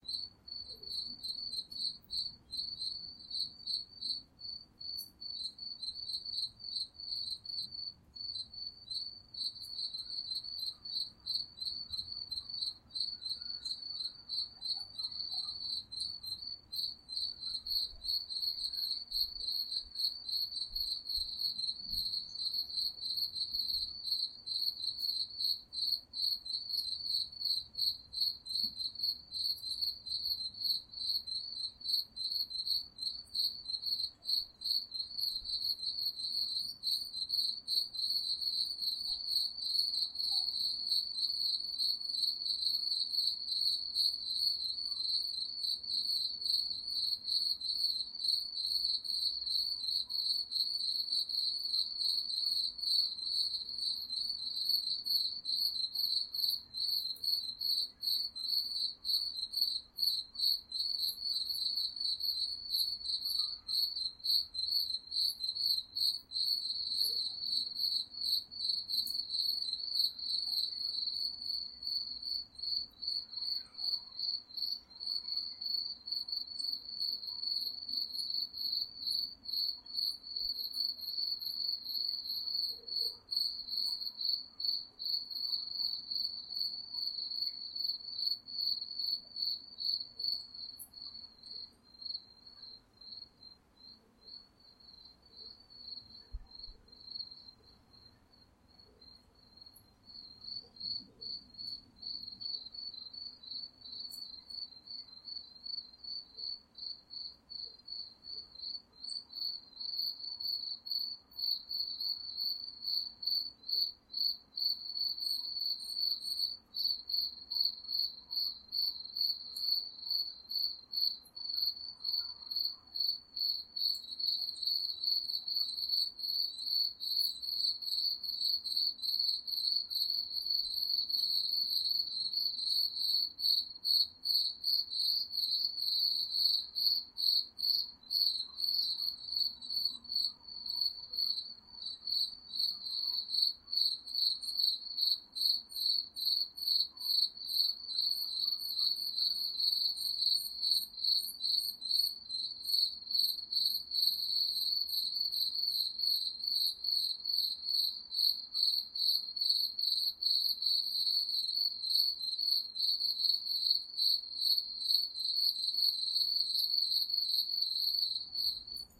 Crickets At Night - Clean sound
Crickets recorded at night, in my garden, in summer.
Recorded with a Tascam DR-05. The sound was post-processed with Audacity to remove the background noise (it was a stormy weather).
If you want the raw sound without post-process, find "Crickets At Night - Raw sound".
I recorded this sound intended to use it in the video game I'm currently developing : The Elven Decline.